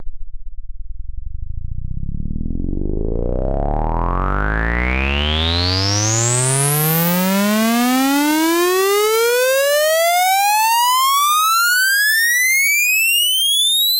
Riser made with Massive in Reaper. Eight bars long.